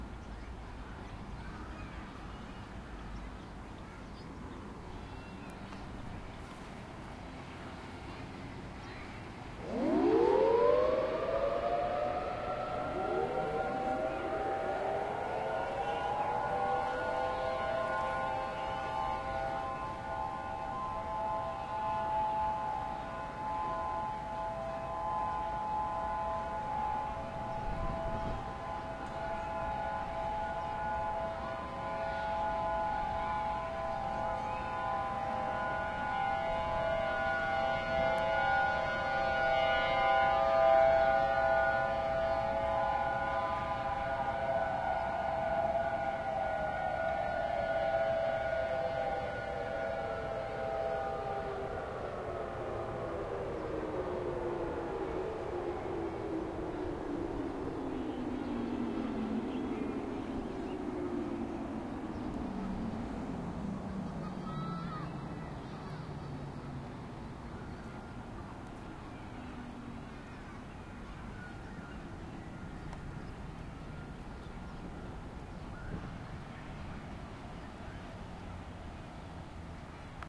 Federal Signal Symphony
Hawaii's siren test day is every first working day of the month. Recorded March 3rd 2008 at 11:45am on a Roland Edirol R-09. My very first field recording... some wind + handling noise + internal mics being used. We all gotta start somewhere!
Sirens heard: Three Federal Signal Thunderbolt 1000Ts, and an EOWS 612
1000t, 612, alert, civil, defense, emergency, eows, federal, hawaii, honolulu, signal, siren, test, thunderbolt